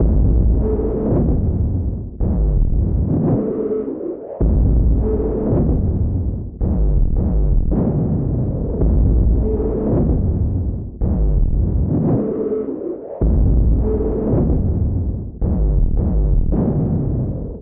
Slow Beast (Lowpass)
This is a 109 BPM loop I made for a song released in 2007. These are only the lower frequencies, but there is a highpass version and a mixdown of this beat, too.
Hope you like it.
109, beat, bpm, dark, loop, low, lowpass, slow